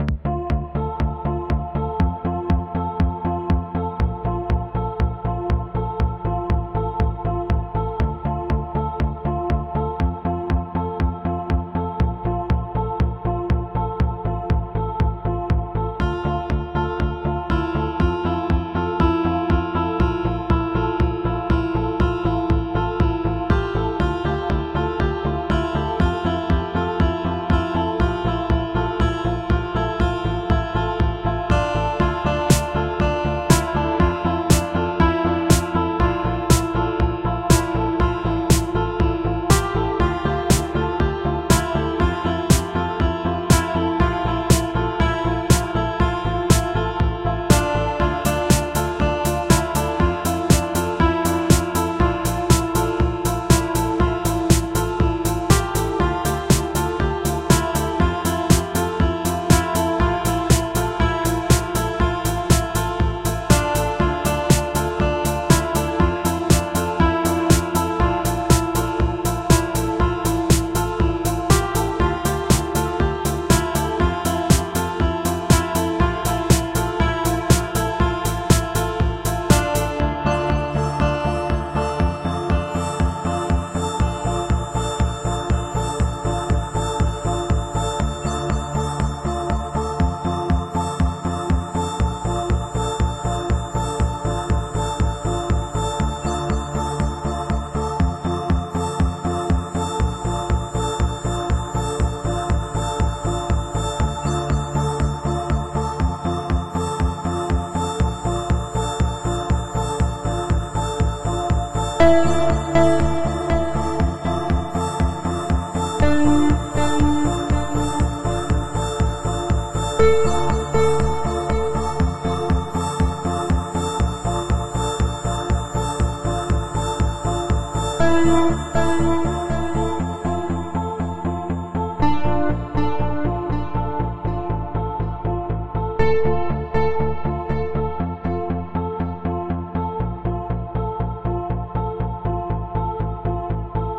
Electronic pop & Sweet guitar.
Synths:Ableton Live,Kontakt,Silenth1,S3 Vsti.
120, Electronic, Sweet, acoustic, chord, clean, electric, guitar, keys, loop, magic, music, natural-reverb, original, piano, pop, quantized, rhythm, sounds, string, sustain, synth